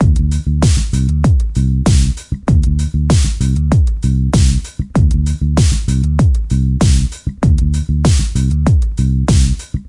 PHAT Bass&DrumGroove Dm 4
My “PHATT” Bass&Drum; Grooves
Drums Made with my Roland JDXI, Bass With My Yamaha Bass
Ableton-Bass, Bass-Sample, Bass-Samples, New-Bass, Synth-Bass, Bass-Loop, Synth-Loop, Bass, Beat, Funk, Logic-Loop, Funky-Bass-Loop, Soul, Ableton-Loop, Loop-Bass, jdxi, Fender-PBass, Bass-Recording, Bass-Groove, Funk-Bass, Groove, Fender-Jazz-Bass, Compressor, Jazz-Bass, Hip-Hop, Drums